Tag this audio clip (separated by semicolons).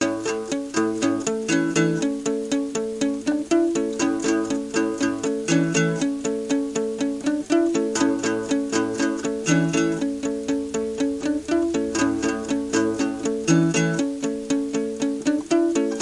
samples; loops; sounds; rock; percussion; free; vocal-loops; Folk; melody; loop; bass; guitar; acoustic-guitar; beat; piano; drum-beat; voice; drums; looping; original-music; acapella; harmony; Indie-folk; whistle; synth; indie